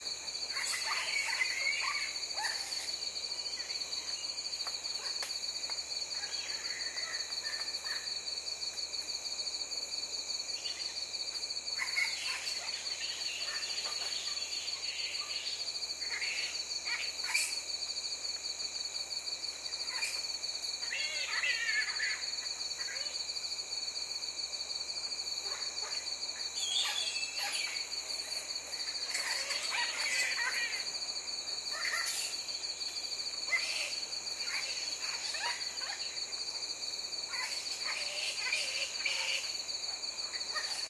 Flying Fox 1
A colony of Flying Foxes jostle for position one night, in the trees at Palm Cove (Queensland, Australia). Pteropus conspicillatus also known as the Spectacled Fruit Bat, lives in Australia's north-eastern west regions of Queensland. It is also found in New Guinea and nearby islands.
Recording chain: Panasonic WM61-A home made binaural mics - Edirol R09HR recorder.